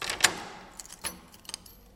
Clattering Keys 03
clattering, metal, motion, rattle, rattling, shake, shaked, shaking